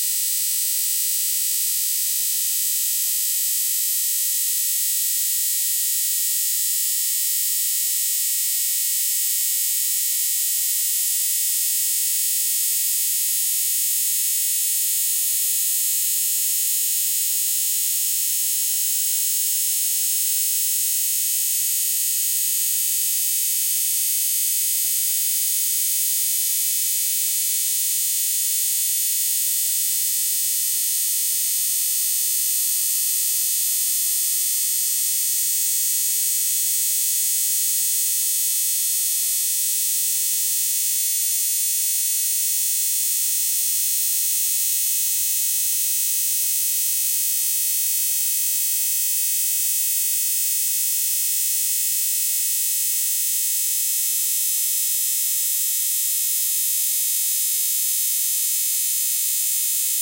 Sound created from using the rings of Saturn as a spectral source to a series of filters.
The Saturn spectrogram was directly translated into sound by dividing it to three color planes, which each consisted of a filter unit of 340 resonant filters. The x-axis of the spectrogram indicated frequency, while the light intensity indicated amplitude.
The resonant filter units were driven with ludicrously high resonance value of one million for accurate reproduction. A spectrogram created from the sound resembles the original at very high confidence.
This is how Saturn rings would sound if they were directly translated into sound as a spectrogram. The stereo image consists of 340 steps, where each filter unit provides it's frequency. It's expected that it's tilted left when listening, because major part of the high frequencies are inaudible for casual listener.